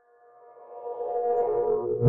This sound or sounds was created through the help of VST's, time shifting, parametric EQ, cutting, sampling, layering and many other methods of sound manipulation.
Any amount donated is greatly appreciated and words can't show how much I appreciate you. Thank you for reading.
processed, sounds, ambiance, extreme, ambient, atmosphere, lovely, reverb, screen, chords, electronic, synth, digital, pauls, stretched, project, bass, loading, game, samples, loops, beautiful, music, sound, effect, reverbed